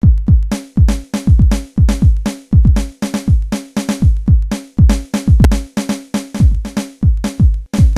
dnb loop 240
240bpm rhythmic drumloop made in hydrogen drum machine